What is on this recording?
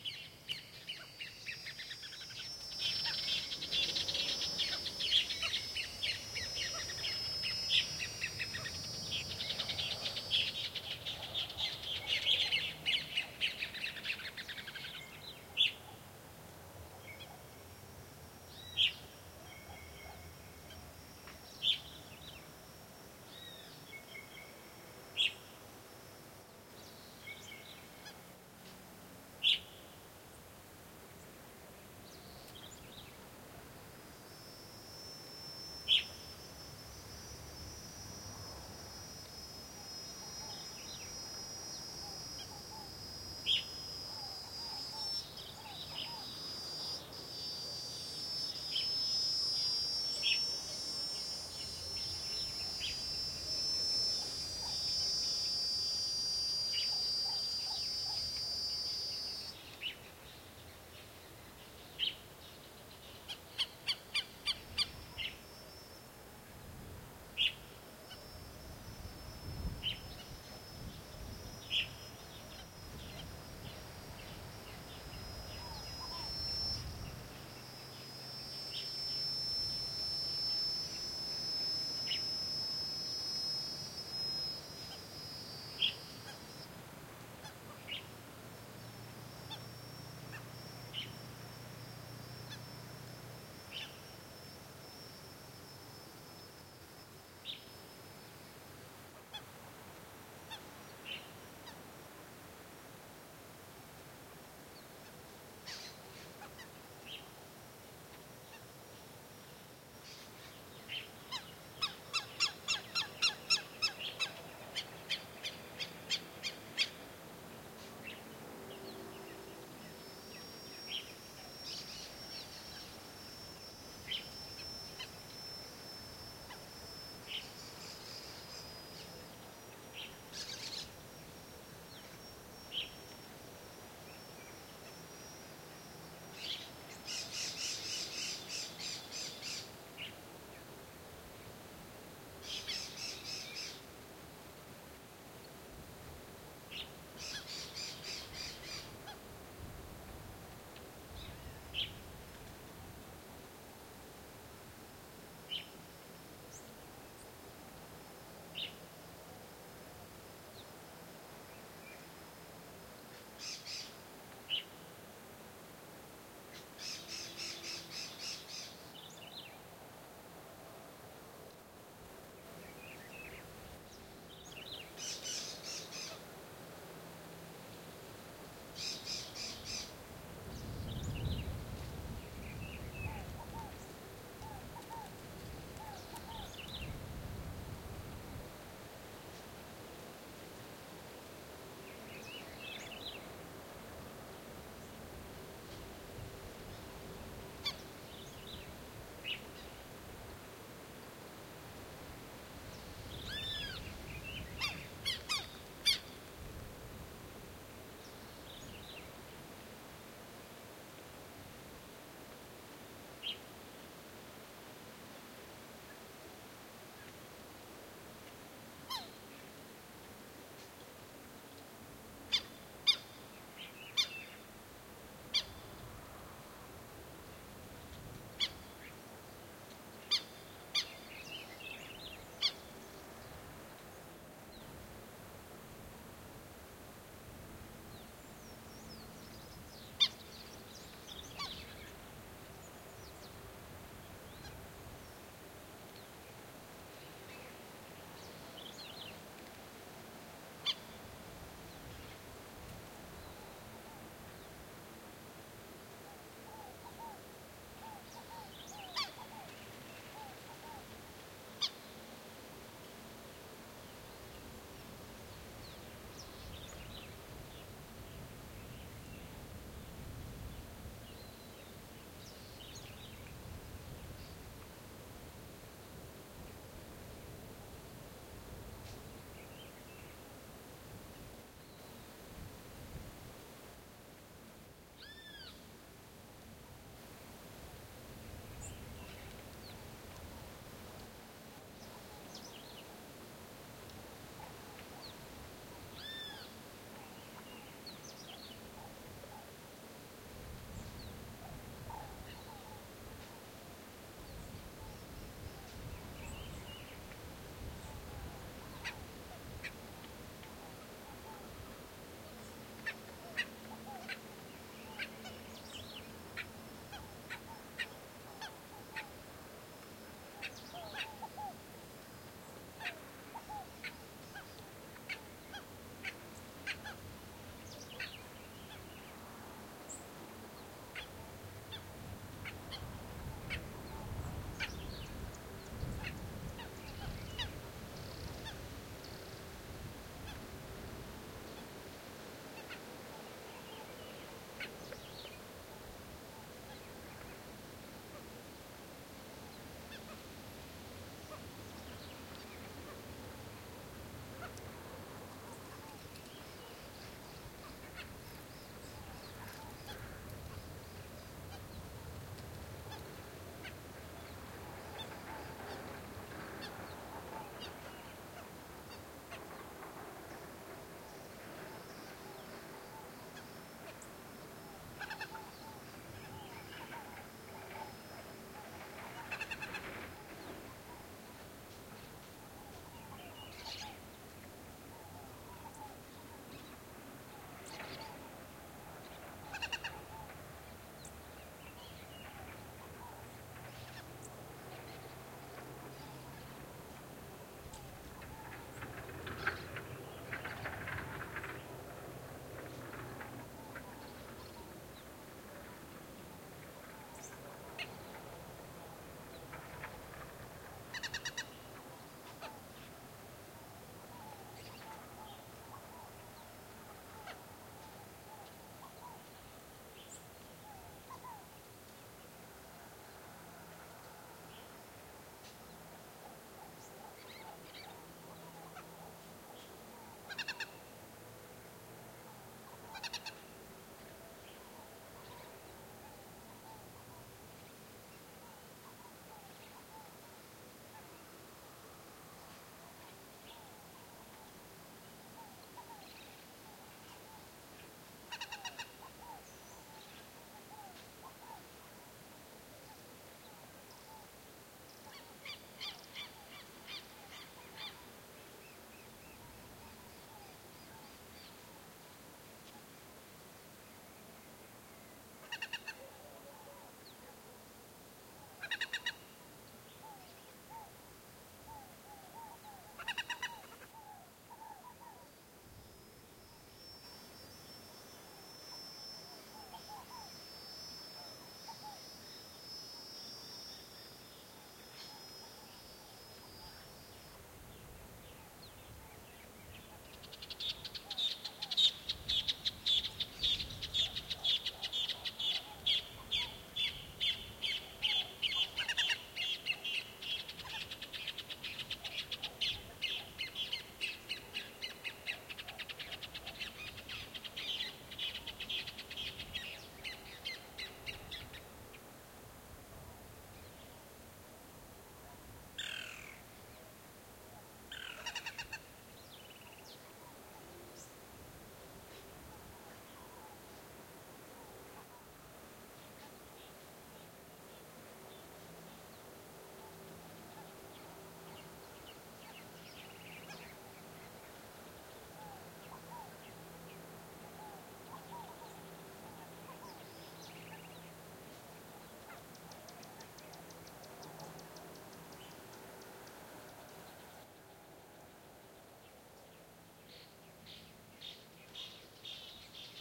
Rural ambiance 01
Stereo field recording with a pair of RODE NTG-2 mics. Rural open field ambiance in the morning. You can hear a variety of birds. Recorded in Quixadá, Ceará, Brazil.
ambiance, birds, Brazil, field-recording, nature